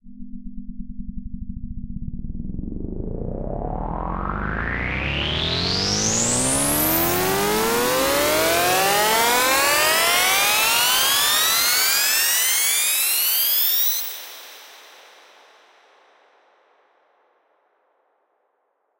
Riser Pitched 05b
Riser made with Massive in Reaper. Eight bars long.
dance, edm, percussion, synth, techno, trance